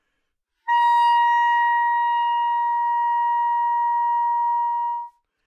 Part of the Good-sounds dataset of monophonic instrumental sounds.
instrument::clarinet
note::Asharp
octave::5
midi note::70
good-sounds-id::1569
clarinet, neumann-U87, good-sounds, Asharp5, multisample, single-note